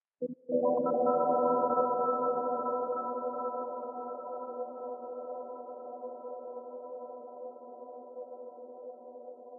KFA18 100BPM
A collection of pads and atmospheres created with an H4N Zoom Recorder and Ableton Live
spacey, electronica, pad, far, chillwave, euphoric, ambience, distance, melodic, atmospheric, warm, calm, polyphonic, chillout, soft